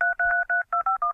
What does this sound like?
Dialing on an iPhone
Dialing a random seven-digit number on an iPhone!